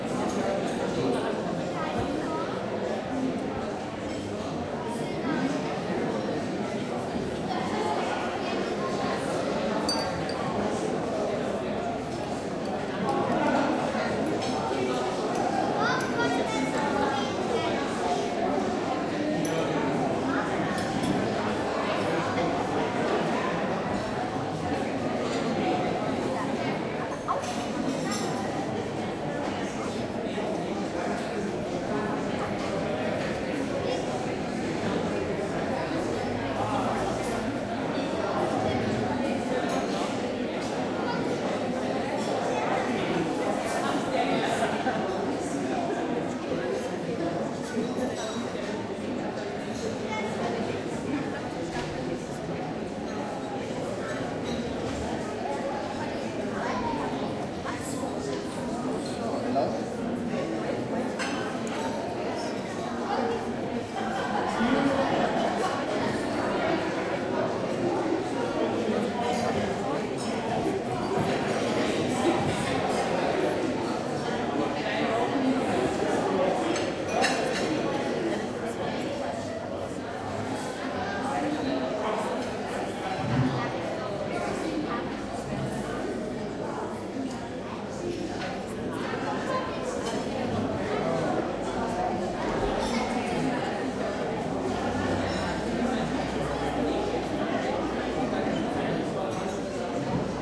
140812 Vienna CafeZentral R
4ch surround recording of the interior of the Café Zentral in Vienna/Austria. It is breakfast time, and visitors of all nations are seated in this famous establishment for coffee, pastry etc, talking and clattering loudly, filling the warm and rich acoustic space with life.
Recording conducted with a Zoom H2.
These are the REAR channels, mics set to 120° dispersion.
ambience, Austria, busy, caf, cafe, city, clatter, crowd, eating, field-recording, food, hall, interior, people, restaurant, surround, talking, urban, Vienna, Wien